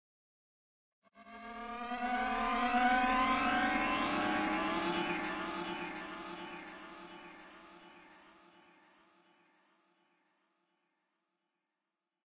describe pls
Tortured violin to make something creepy
scratching, scratch, record